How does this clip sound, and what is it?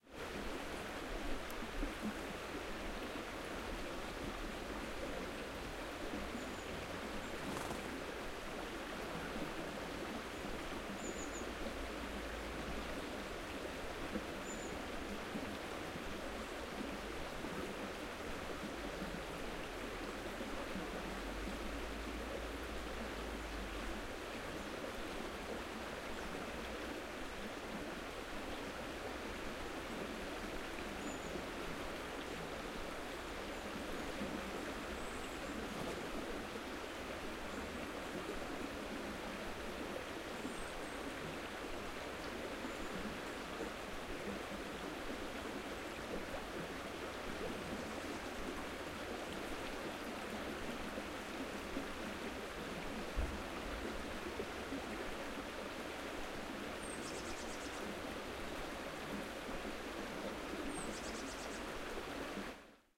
flowing river in the woods
flowing, river, woods